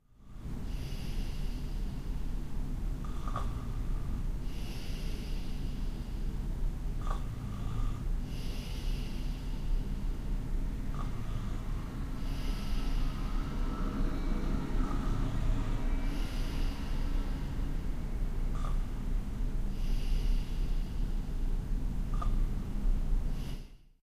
A scooter is passing through the street that leads along the apartment building in which you'll find my flat on the third floor. I am asleep as you can hear. I switched on my Edirol-R09 when I went to bed. The other sound is the usual urban noise at night or early in the morning and the continuously pumping waterpumps in the pumping station next to my house.